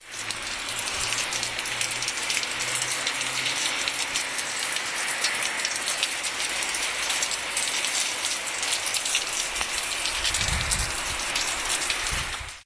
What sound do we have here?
29.06.09 Poznan (Poland, Greater Poland): sound recorded by the kitchen window;
recorder: sony ICD-SX46
processing: fade in/out
rain, downpour, thunderstorm